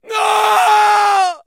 Male screaming "No!", expressing sadness of losing something or someone.
Recorded with Zoom H4n